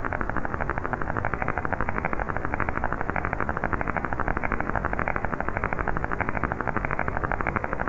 When my laptop battery is full and the charger cable is plugged in, my microphone makes a weird buzzing noise. I recorded this and slowed it down and altered the pitch a little bit and here you go.
Comment if you use it.